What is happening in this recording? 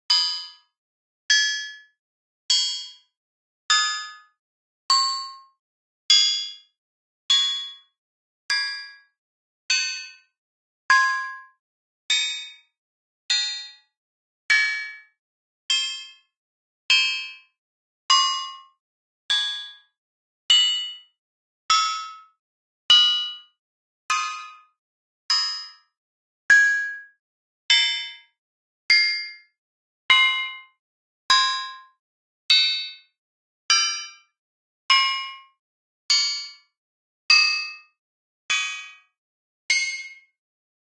The sound from two swords smacked together in the heat of the battle. Created with Image-Line's Ogun. No effects, each hit has a new tonespectra giving each hits new and fresh sounds. "Sword clanks 2" is a bit more deeper in it's timbre.